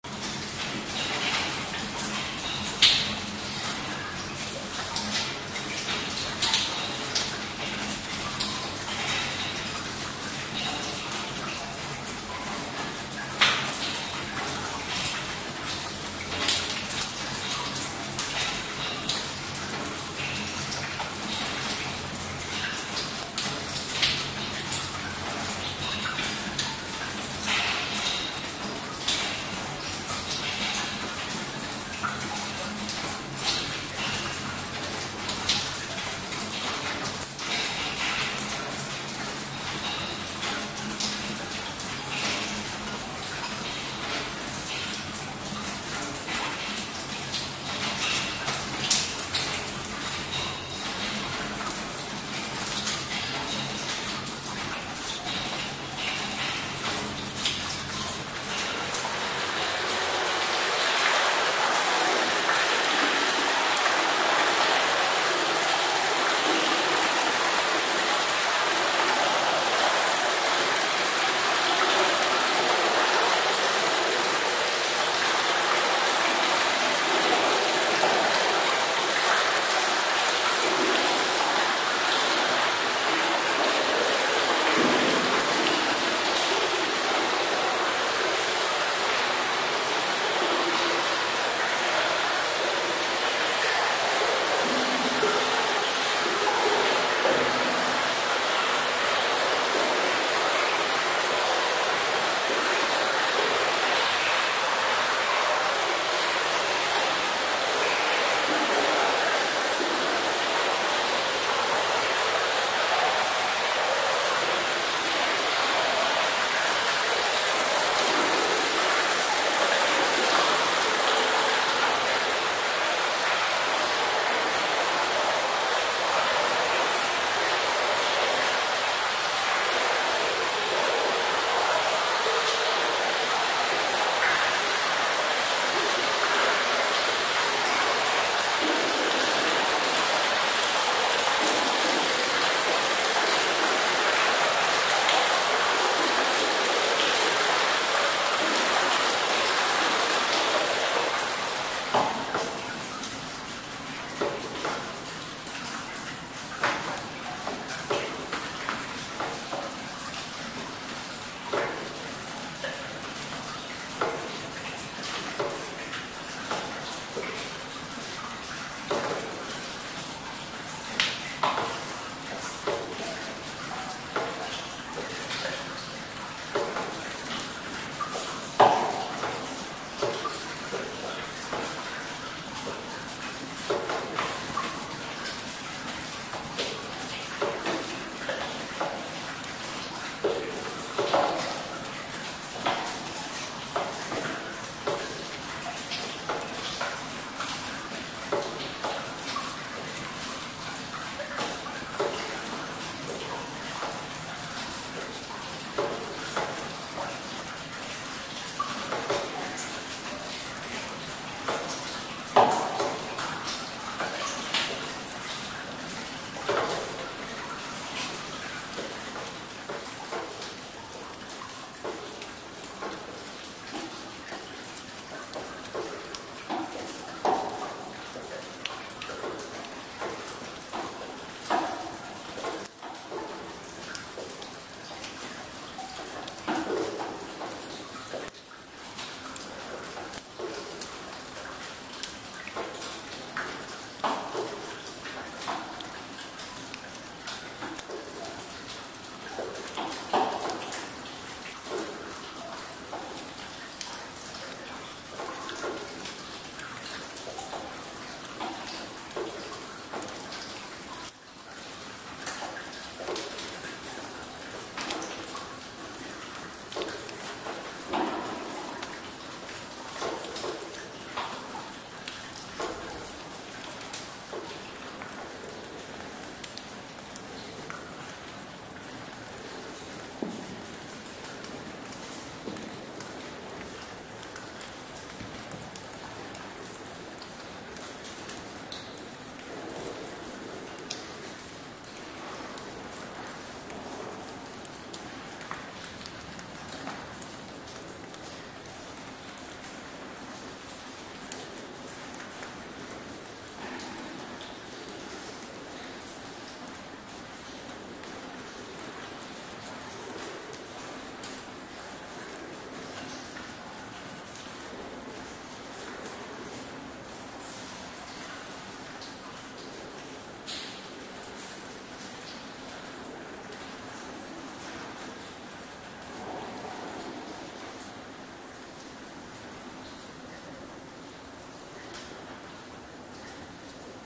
Sound atmosphere inside a former underground military base recorded with a Nikon Coolpix P7100.